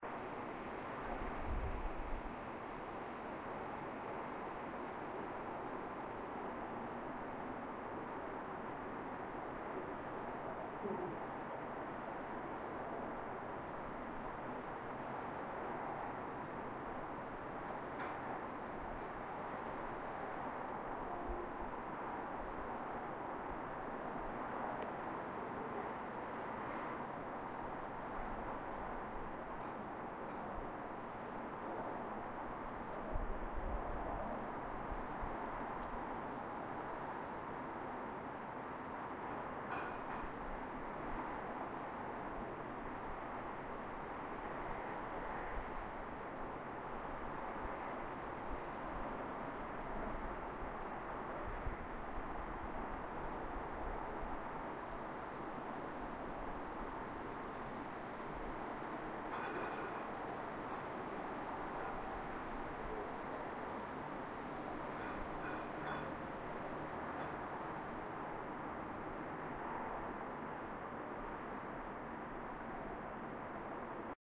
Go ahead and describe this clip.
City Sound at night
Sound of Cologne at night
wind, city, night, noises, highway